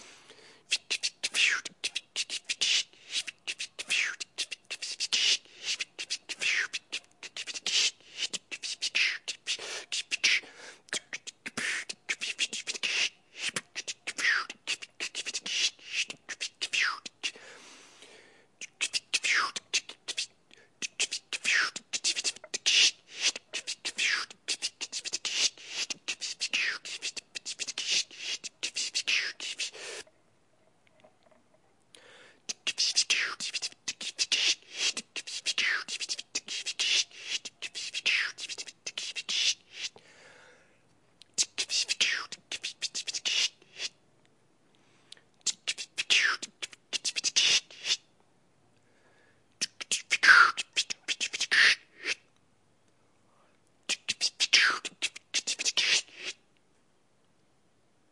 Some beatboxing stuff here with various SFX- all done with my vocals, no processing.
bass, beatbox, beatboxing, chanting, detroit, drum, drumset, echo, fast, hi-hat, loop, male, man, SFX, snare, strange, tribal, tribalchanting, weird